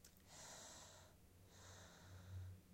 3 oveja respirando
breathing, exhale, breathe